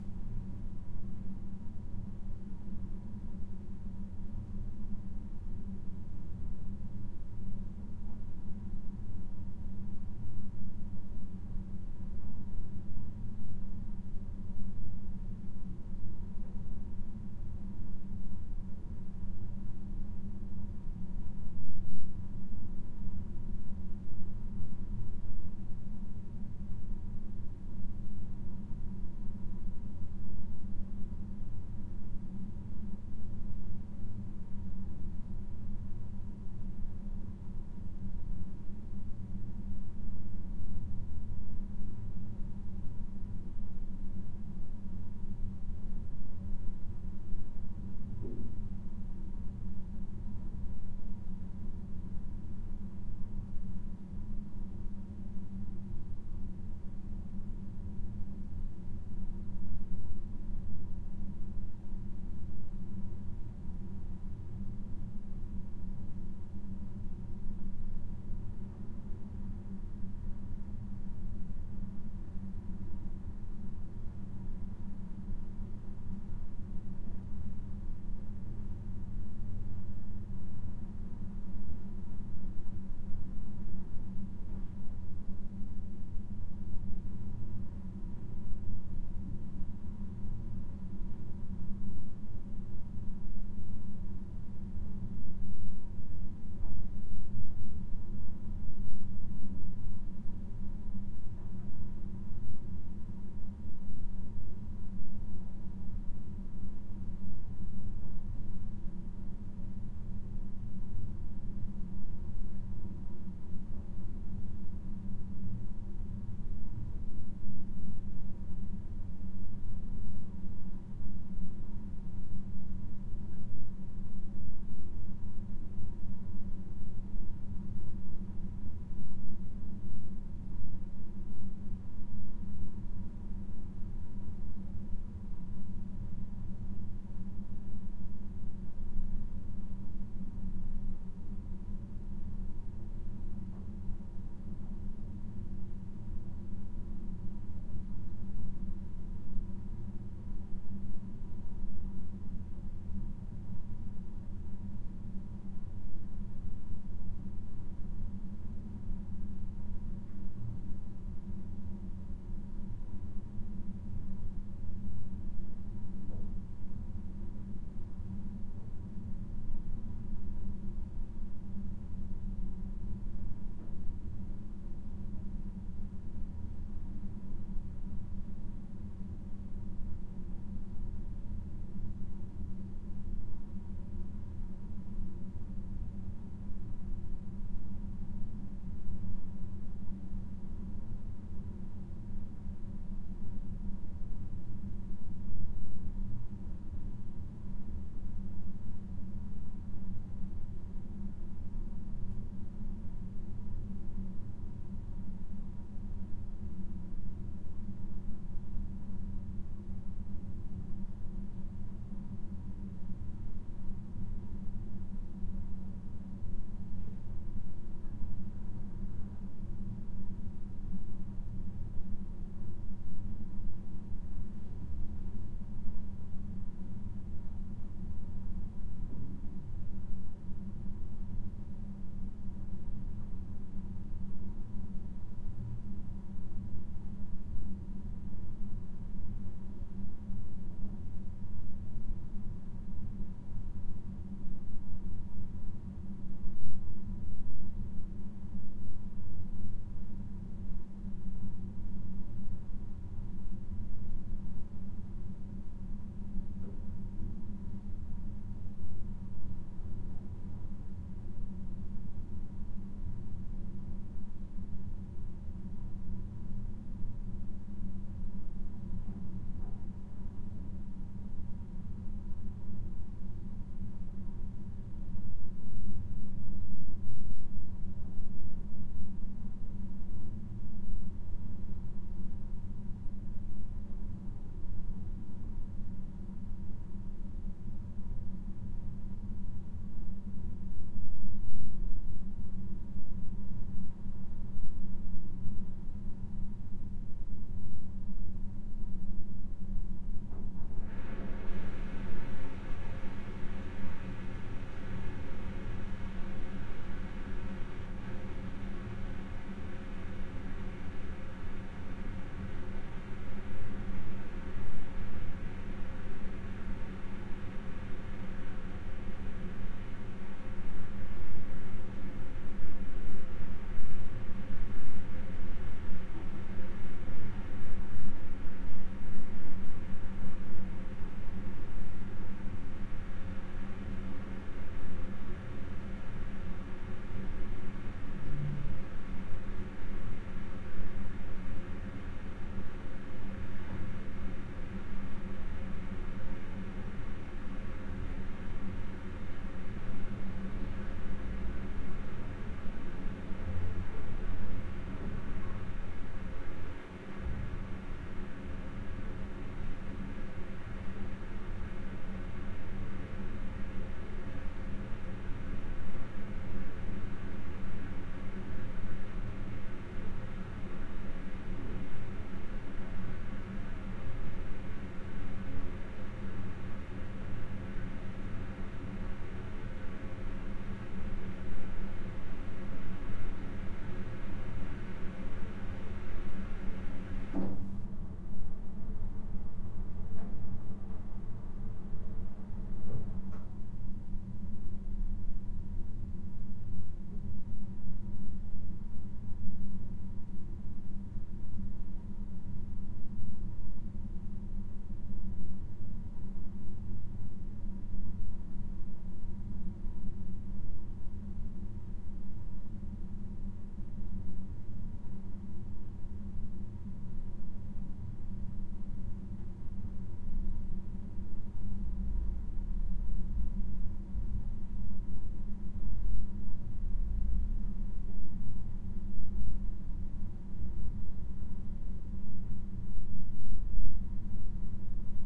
a bathroom ambience with slow ventilation noise. around the 5th minute, a neighbour is flushing his toilet.
Elation KM201-> ULN-2.